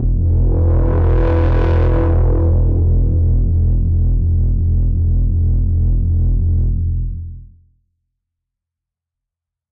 Moog Martriarch Heavy Bass Single Note by Ama Zeus
This is Moog Matriarch analog bass!
analog, bass, Matriarch, Moog, synth, synthesizer